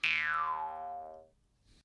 Jaw harp sound
Recorded using an SM58, Tascam US-1641 and Logic Pro